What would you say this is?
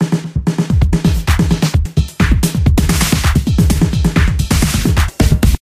hip hop 6
sample sound loop
beat, dance, disko, Dj, hip, hop, lied, loop, rap, RB, sample, song, sound